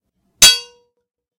Anvil Hit 2
An anvil being struck by a metal hammer.
hit, metal, impact, anvil, smithing, strike, industrial